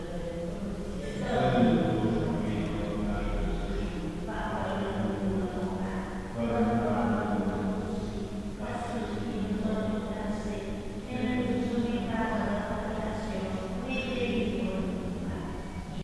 People praying in s French church - lots of natural reverb but no added effects. Minidisc recording.